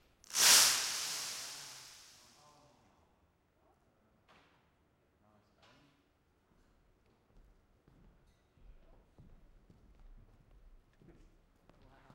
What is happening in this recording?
windscreen glass dropped from seven meters hitting the ground